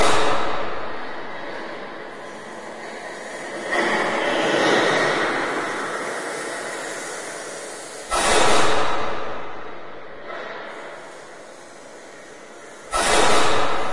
JBF Dogs Ear
Ambiance for a world inside a dog's ear
ear, dog